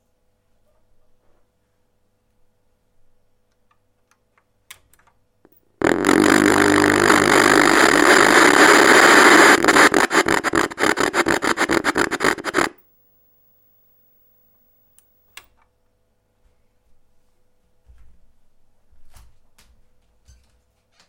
Broken TV - Track 1(10)
Some noises from my broken TV set.
noise, tv, tv-snow